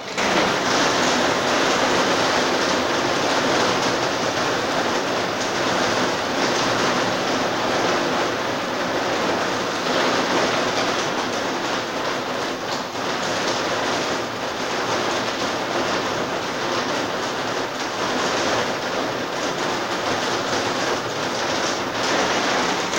Lluvia interior
it was raining so i recorded it
recorded with: Polaroid Turbo E P4526
Edition: Adobe Audition
Location: Guadalajara, México
Date: June 20th 2018
Rate and Enjoy :D